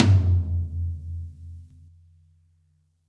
Middle Tom Of God Wet 013
middle, drum, drumset, tom, set, pack, kit, realistic